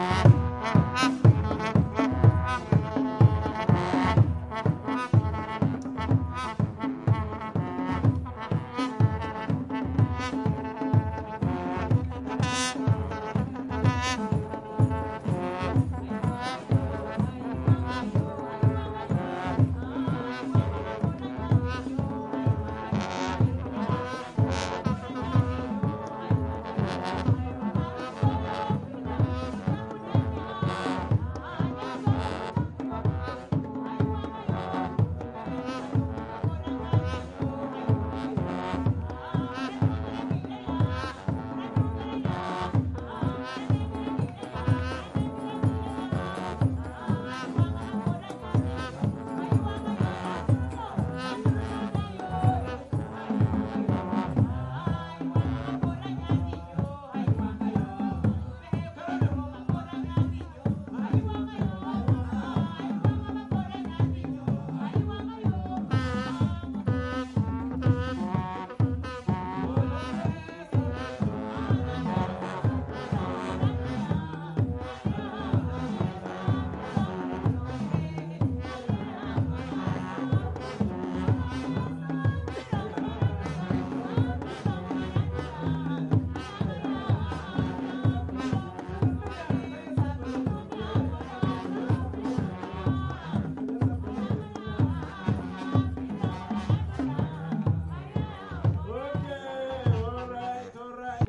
A performance of the Ndere music group in Kampala